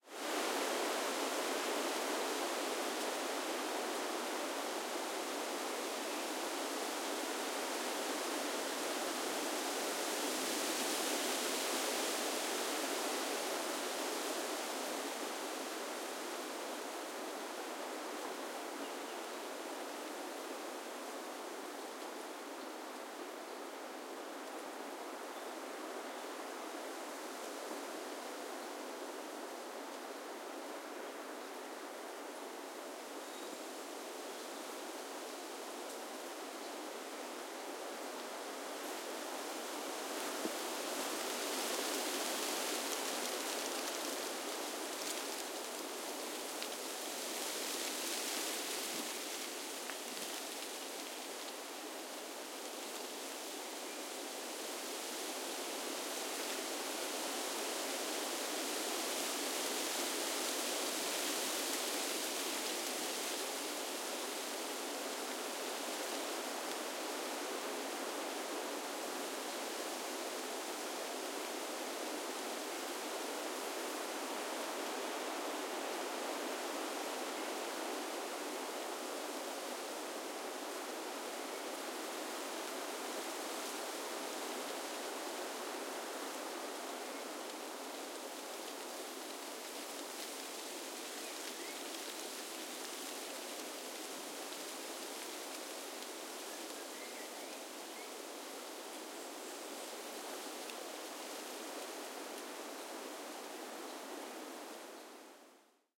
Leaves rustle in the wind
Relaxed athmosphere of rustling leaves in the wind in a forest. Recorded during a short walk.
nature, trees, wind, rustles, leaves, tree, forest, birds, field-recording